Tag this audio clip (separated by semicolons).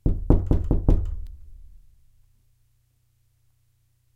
slow; knock; rattle; loose; door